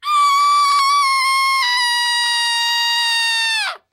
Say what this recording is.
Girl Scream
terror, Girl